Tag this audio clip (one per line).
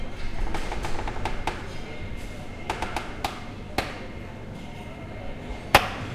bar
campus-upf
closing
door
slowly
UPF-CS12